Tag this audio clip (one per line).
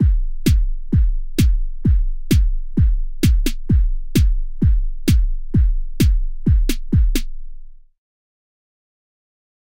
130-bpm
beat
dance
drum
drum-loop
groovy
loop
percussion-loop
rhythm